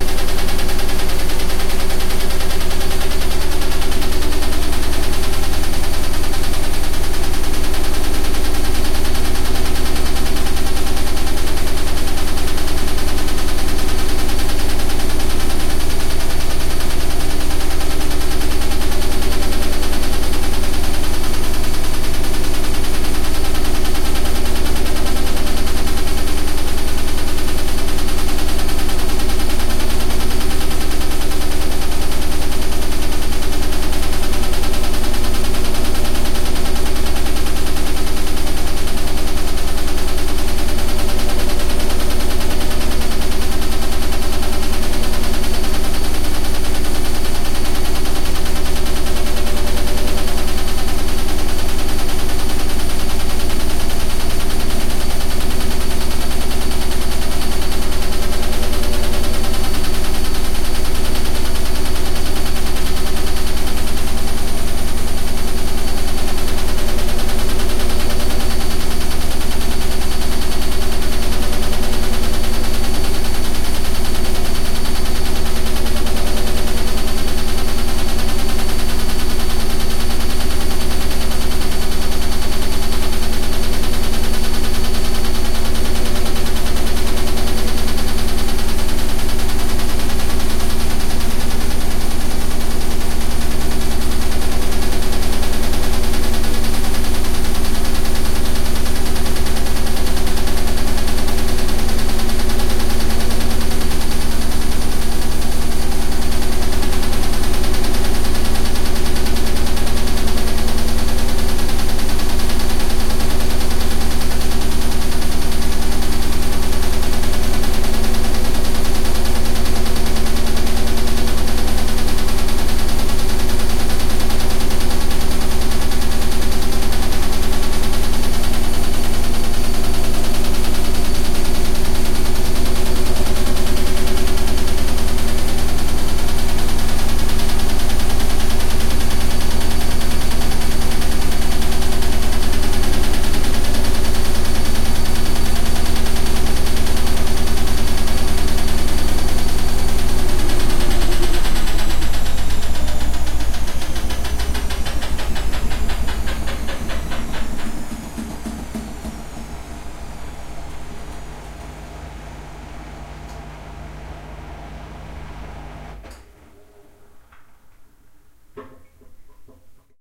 220406 0436 washing machine
My washing machine is starting to make a squeaking sound during the spin cycle. Recorded with a Tascam DR-05X.
cycle, electric, machine, mechanical, motor, spin, squeak, wash, washing, washing-machine